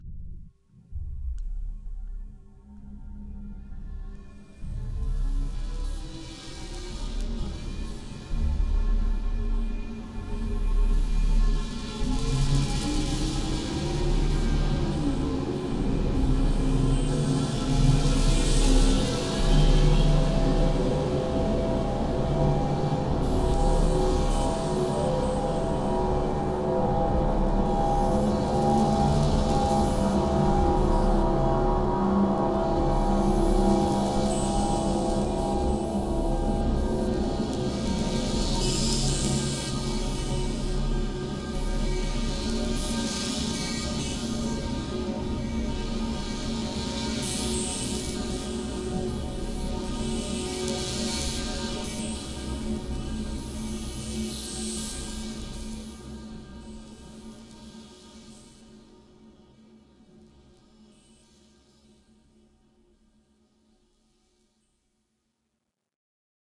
strange worlds 02 16 bit 48000khz
3 samples blended together.very spacey sound.
ambient, space, wierd